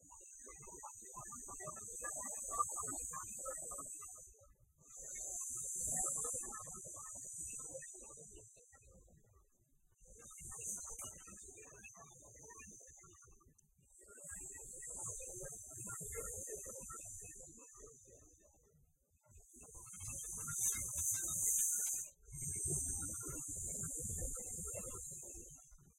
Monster breathing 1
horror, creepy, disturbing, Monster, breathing, scary, terror